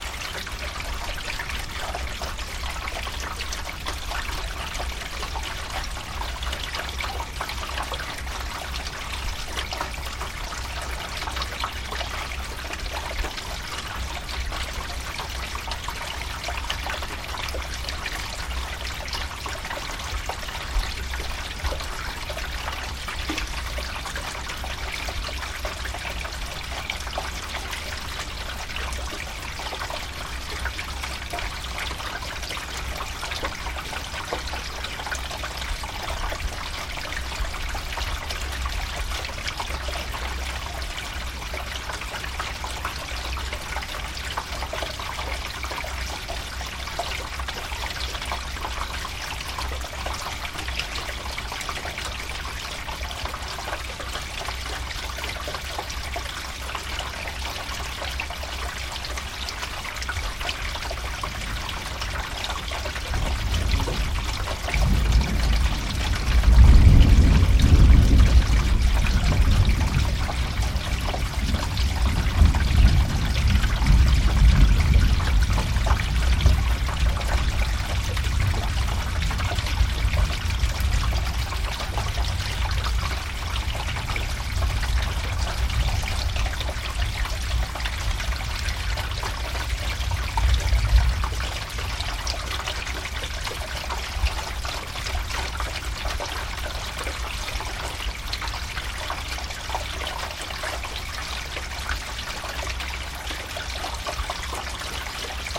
Water in drain pipe with thunder Mono
A mono recording of rain water running in drain pipe with thunder in background.
Recorded using Zoom H5 and R0de NTG-3 Mic
pipe, thunder, drain, water, mono, storm